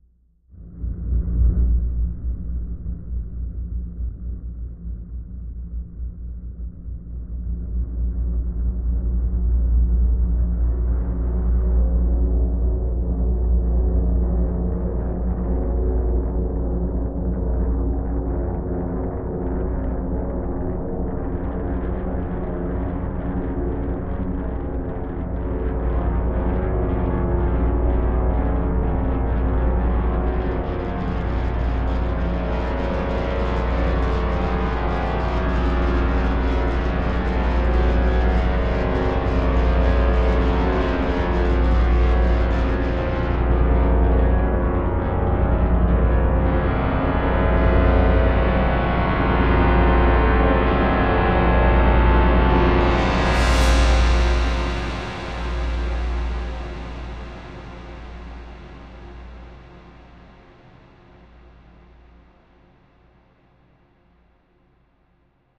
A deep slow building ominous synth sound originally created as an intro. Part of my Atmospheres and Soundscapes 2 pack which consists of sounds designed for use in music projects or as backgrounds intros and soundscapes for film and games.
ambience, atmosphere, crescendo, dark, electro, electronic, intro, music, processed, synth